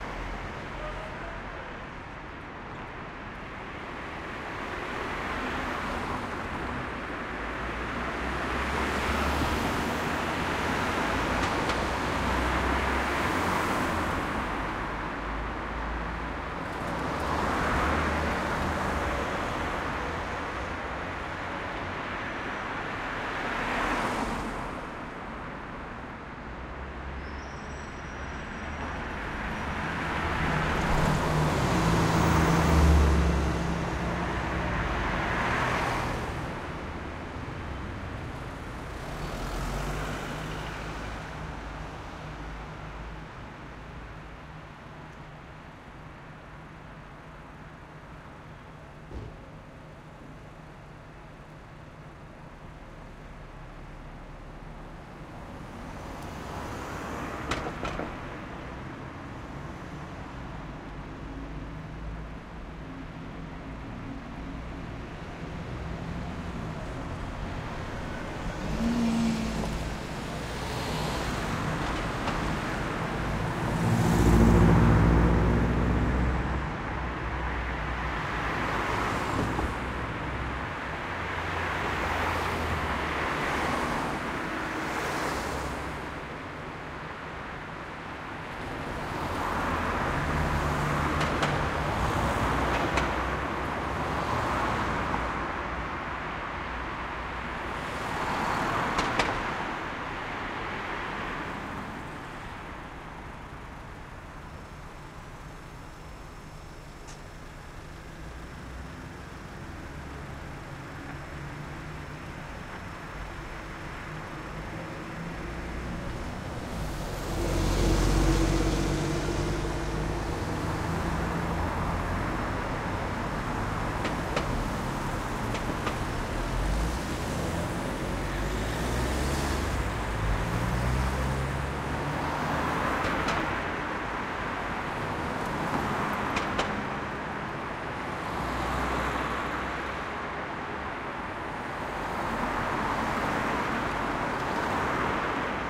London UK Traffic 1 - Feb 2013
This is a stereo recording of traffic ambience in Greenwich, London, UK. This recording is unedited, so it will need a bit of spit and polish before use.
bus, london, urban, morning, van, car, early